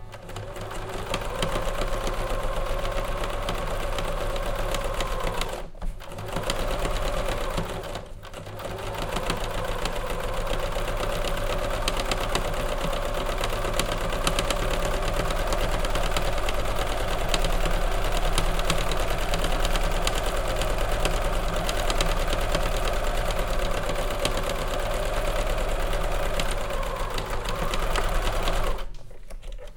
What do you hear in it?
sewing-longrun-2
Recording of a modern sewing machine (brand unknown) sewing one basic zigzag seam. Recorded for Hermann Hiller's performance at MOPE08 performance art festival in Vaasa,Finland.